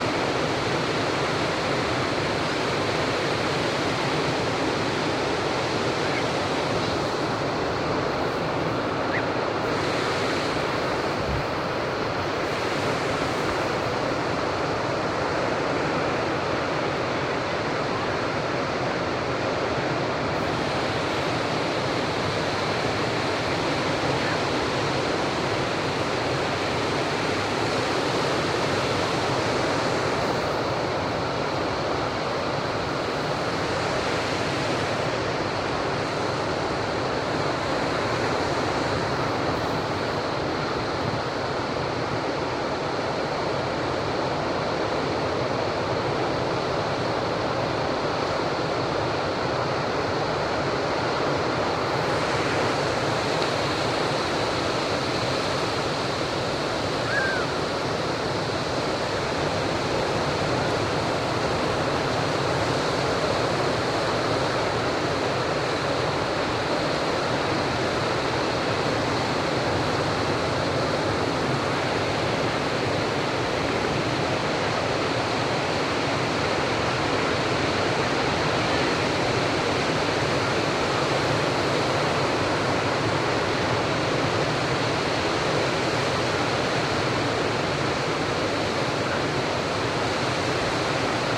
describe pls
Waves and ocean sounds at the shoreline. Microphone: Rode NTG-2. Recording device: Zoom H6.